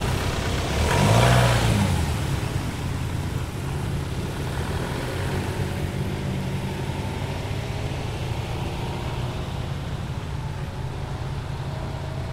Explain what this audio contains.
drive away FORD TRANSIT AMBULANCE
Ford Transit based ambulance vehicle idle & drives away in Moscow traffic, engine, front perspective, tires included